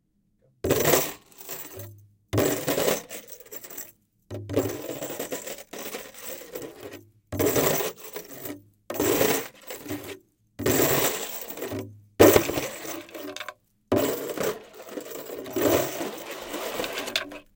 Chain Drum
garcia, chain